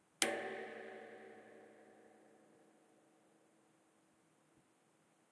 propane tank dry hit 1
Field recording of approximately 500 gallon empty propane tank being struck by a tree branch. Recorded with Zoom H4N recorder. For the most part, sounds in this pack just vary size of branch and velocity of strike.
hit, tank, reverberation, propane, metallic, wood, field-recording